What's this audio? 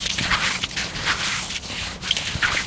20131202 scratching a tree ZoomH2nXY
Recording Device: Zoom H2n with xy-capsule
Low-Cut: yes (80Hz)
Normalized to -1dBFS
Location: Leuphana Universität Lüneburg, Cantine Meadow
Lat: 53.228799966364114
Lon: 10.39853811264038
Date: 2013-12-02, 13:00h
Recorded and edited by: Falko Harriehausen
This recording was created in the framework of the seminar "Soundscape Leuphana (WS13/14)".
scratching, Percussion, University, Campus, Outdoor, Soundscape-Leuphana, xy, Leuphana